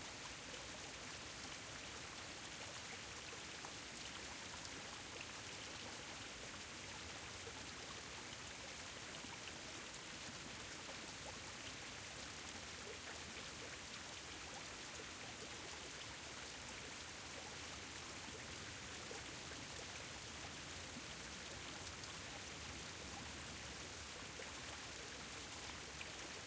Water fountain v1 CsG
field-recording, fountain, stream, water